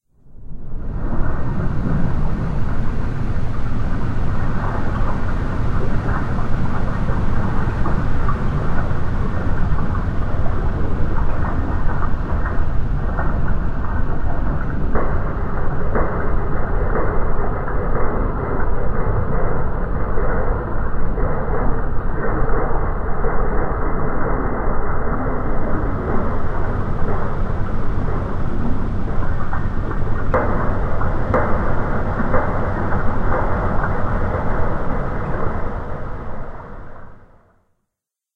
THIS IS REALLY WAR
This sound is really like a war! Airplanes, guns, bombs! Pretty good sound for wars. Took various samples made by me. (yes. me.
Clipped.
Slowed down.
THESE CLIPS WERE REALLY UNRELEASED, MAYBE I WILL RELEASE THEM LATER.
Slowed down, or unprocessed. Clipped.
Slowed down, or unprocessed. Clipped.
And that's about it.